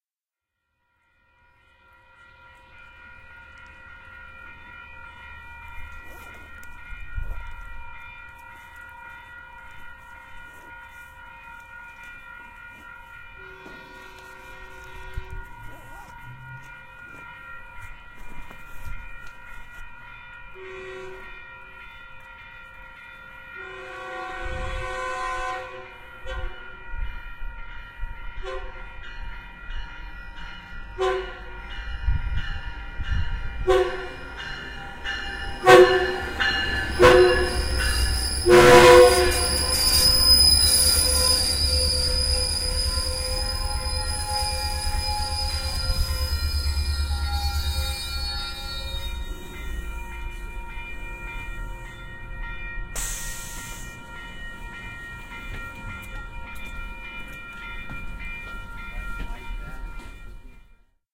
Train arriving at the station platform. Claremont, CA. January. 2019